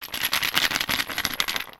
perc-peals-bottle
Peals plastik bottle sounds, recorded at audio technica 2035. The sound was little bit postprocessed.